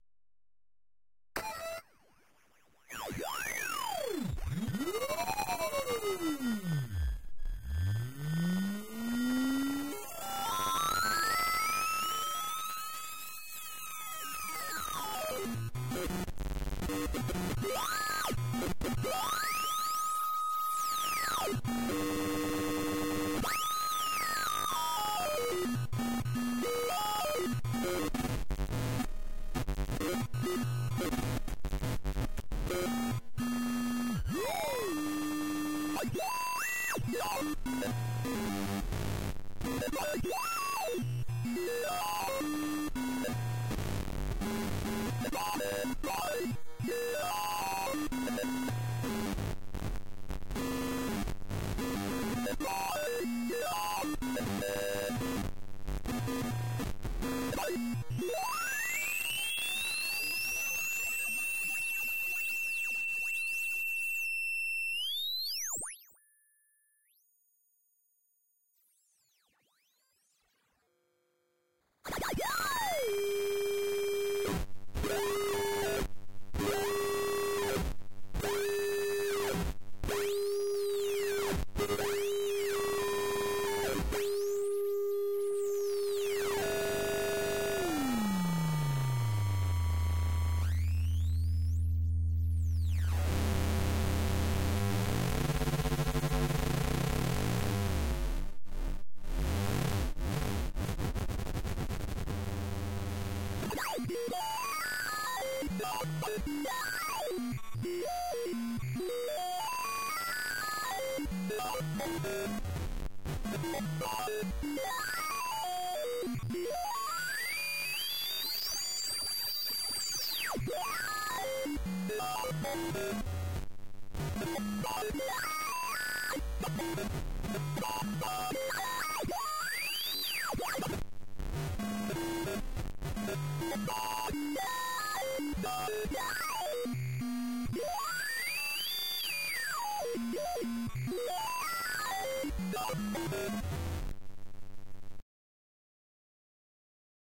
Created by cranking a filter to max resonance, adding distortion, then slowly sweeping the frequency control on a bit crusher.